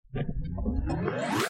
lifting alien sump, pitch bended (curved)denoised brown noise
alien, bended, brown, curved, denoised, lifting, noise, pitch, sump